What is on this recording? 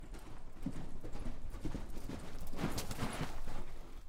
Horse Gallop And Stop In Dirt 01
A horse running/galloping, and stops suddenly.
Brake, Halt, Horse